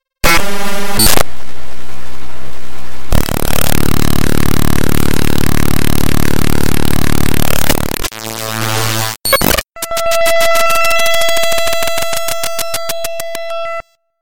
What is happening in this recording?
Raw import of a non-audio binary file made with Audacity in Ubuntu Studio